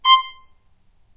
violin spiccato C5
spiccato, violin